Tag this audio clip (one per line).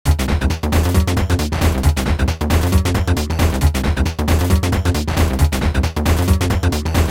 bassline
funky
loop
techno